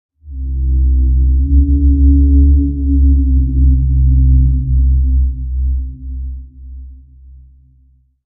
organic moan sound